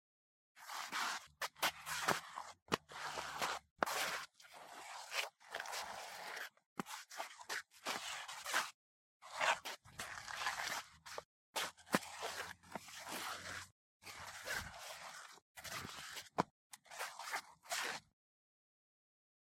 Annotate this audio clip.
Re-mixed and done by down-loading and enhancing the sounds...starvolt is where I got it, so the name says it all. It's really more useful to drop in raw with the with this version as I have turned the vol down to a more natural sound behind other sounds.